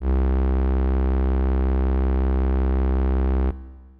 FM Strings C2
An analog-esque strings ensemble sound. This is the note C of octave 2. (Created with AudioSauna, as always.)
pad, strings